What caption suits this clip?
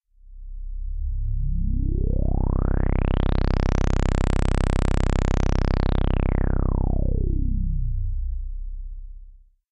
Smooth synth cutfreq fade in/out